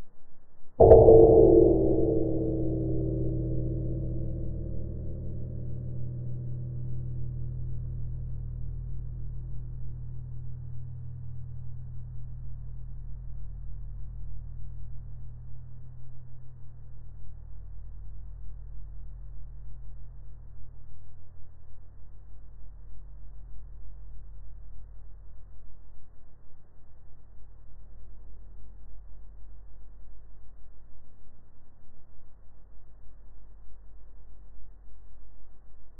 A recording of a simple metal kitchen bowl, hit with a wooden spoon.
Recorded with a TSM PR1 portable digital recorder, with external stereo microphones. Edited in Audacity 1.3.5-beta
bowl, remix, dong, bell, wooden-spoon, kitchen, slower, slow